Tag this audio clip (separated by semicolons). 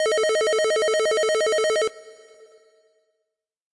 sound; ring; effect; sound-effect; telephone; telephone-ring; FX